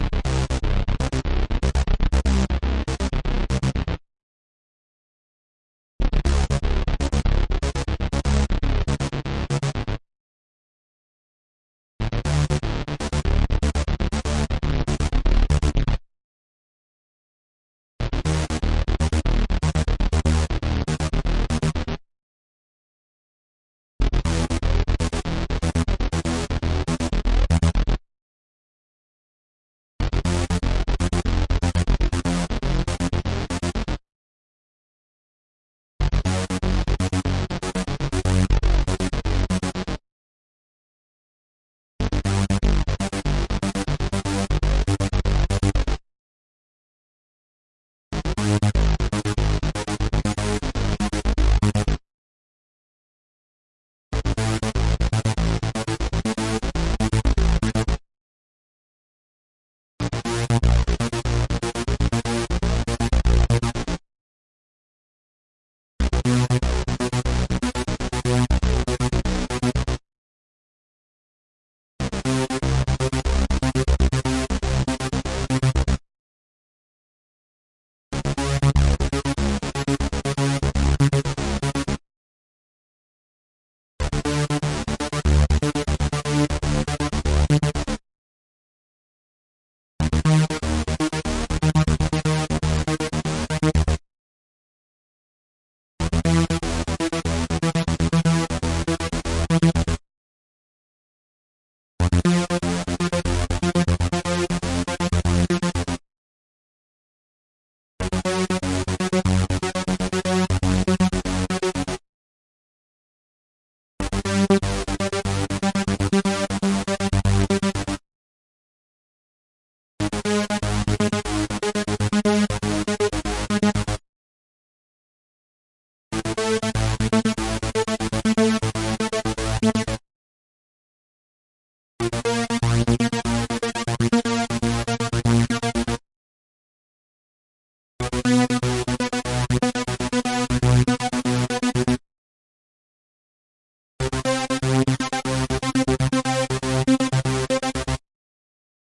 The first of a series of three basslines, the three giving arpeggios-sequences of two bars (separated by one bar) at 120 [BPM] ranging from C3 to C4 (chromatically, thus giving a total of 25 sequences, C3-C4 both included).
Created and recorded with Reaper and the Lush 101 sound synthesizer.
This first sound file gives you a gaga-one direction-pop style bass.